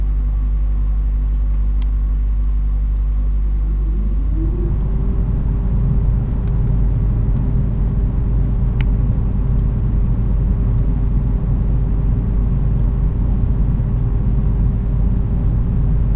Recording made inside a freezer.
Recorded with a Zoom H1 Handy Recorder.